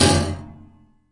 A plastic ruler stuck in piano strings recorded with Tascam DP008.
Une règle en plastique coincée dans les cordes graves du piano captée avec le flamboyant Tascam DP008.
piano, prepared, detuned